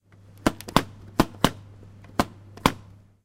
Pressing button to take change from a vending machine.
button, campus-upf, pressing-button, UPF-CS12, vending-machine